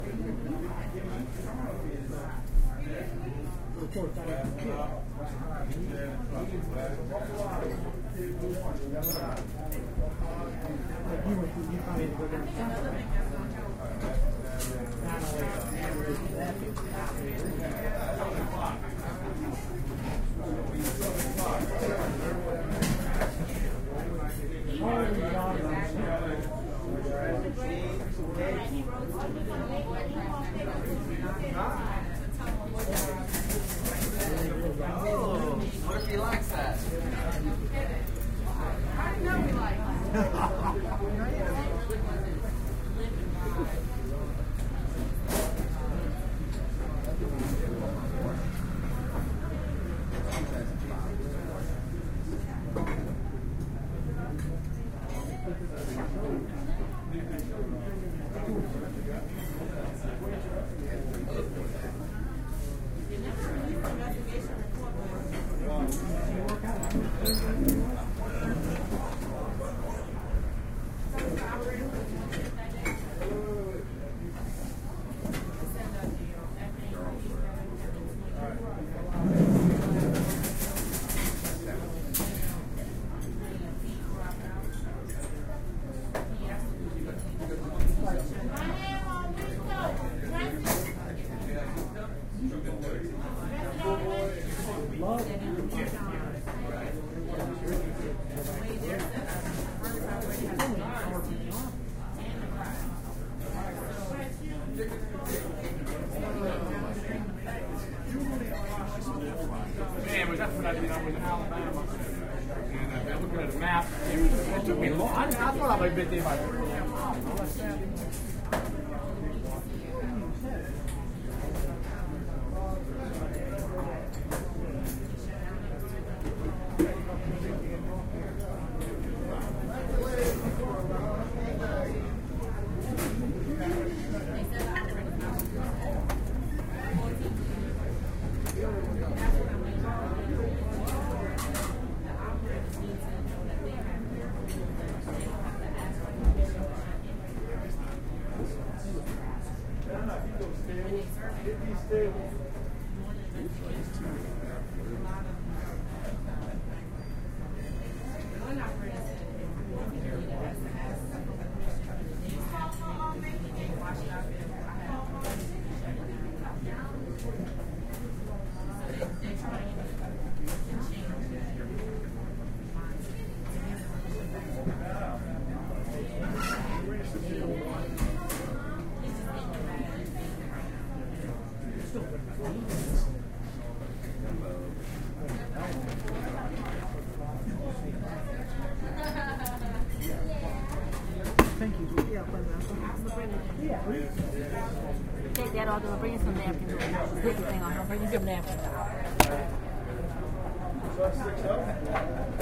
20110315 122246 Majoria's Commerce Restaurant
A recording from Majoria's Commerce Restaurant in New Orleans, LA, 03/15/2011. Record was made from the table closest to the door. Customers can be heard entering and leaving the restaurant. Orders are taken, prepared, and called out over a loud background of conversation. An old-fashioned cash register is used to ring up orders and change (coins) are exchanged. A horse-drawn carriage and a large diesel vehicle are both heard passing while the door is held open.
money, people, restaurant